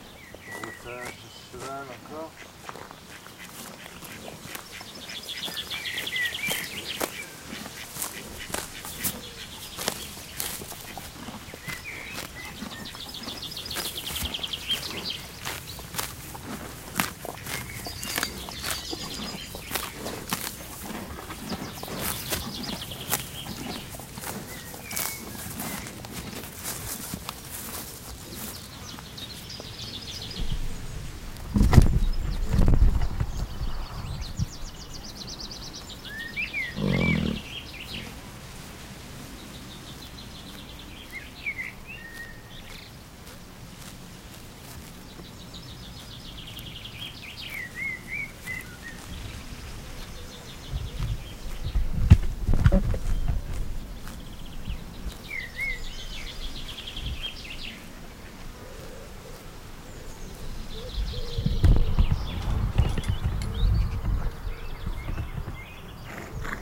Broutage cheval
A horse is recorder while grazing (and also farting) in the country side near a farm
grazing, chewing, farting, horse